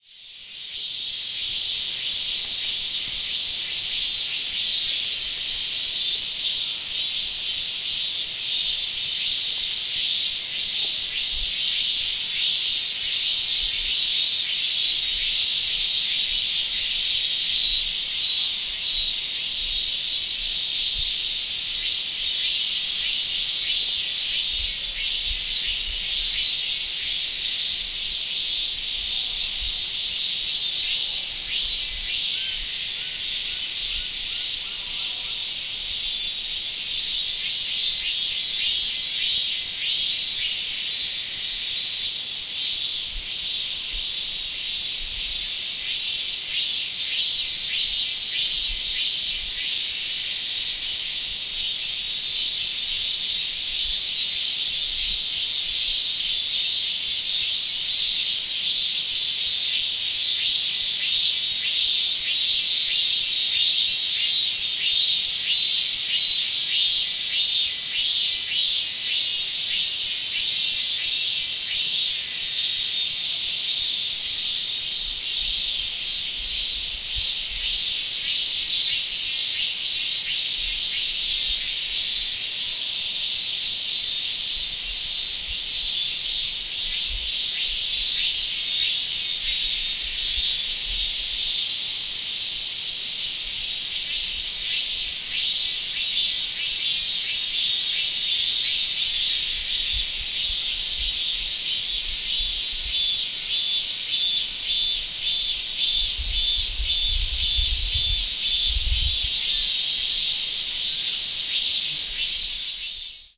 Cicadas and crickets at night near a river in Emei Shan (China)
china, cicada, cricket, crickets, emei, field-recording, insects, nature, night, river, shan, summer